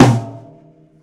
a percussion sample from a recording session using Will Vinton's studio drum set.